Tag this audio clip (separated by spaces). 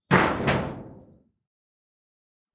bell blacksmith clang factory hammer hit impact industrial industry iron lock metal metallic nails percussion pipe rod rumble scrape shield shiny steel ting